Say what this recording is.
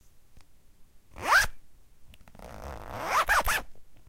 Moving the zipper up and down on a North Face jacket.
aip09, jacket, stanford-university, zipper